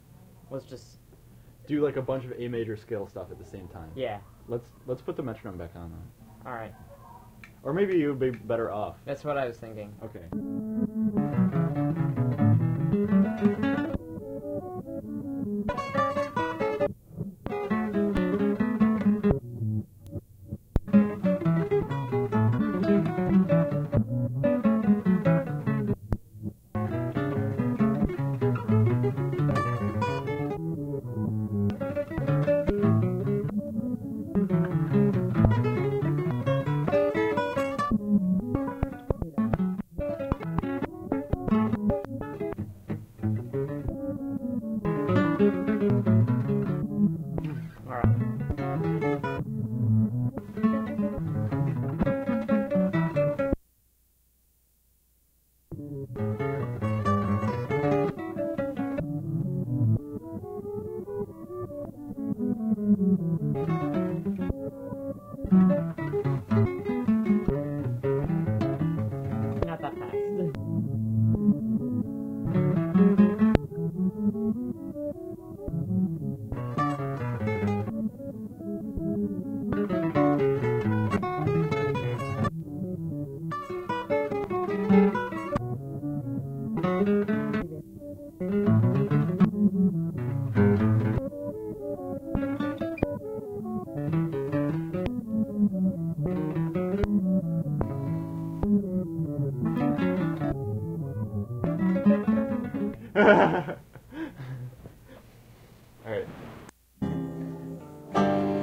guitar tape techniques
guitar recorded on tape, then cut and spliced
A, tape, guitar